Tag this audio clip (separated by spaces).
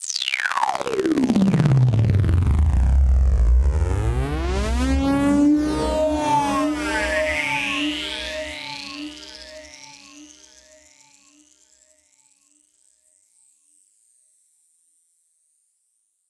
digital future sfx weird acid soundeffect fx sound-design electronic sci-fi synth freaky